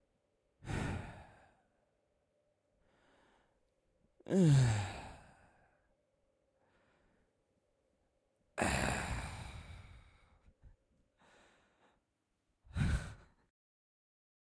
Recorded different sighs.
sigh, OWI, male, disappointed, tired, man, boy